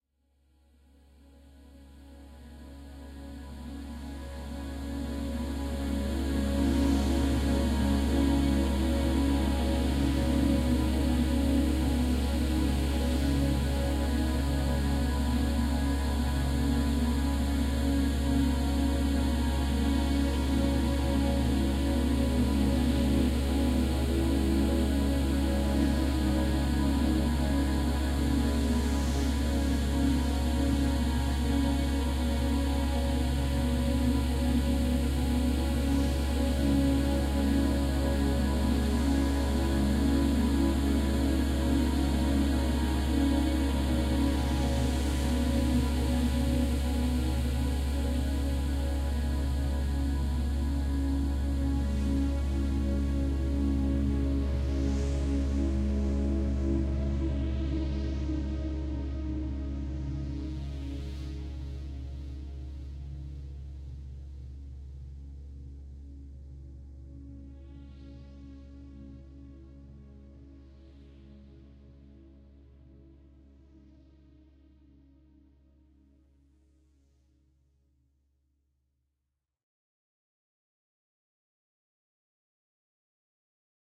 More blurred atmospheric sounds from female vocal recordings.